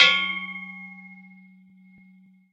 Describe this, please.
iron boom
a strike against a metal tube
tube, metal, bang